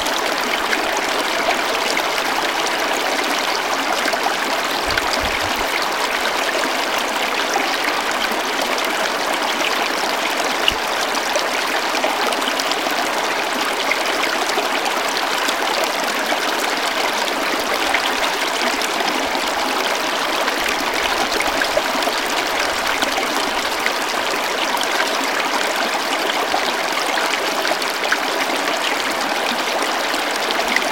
Babbling Brook 01
30 sec clip of the babbling East Allen river running over rocks in Northumberland, England. Taken from HD video recorded using Canon DSLR 3rd June 2017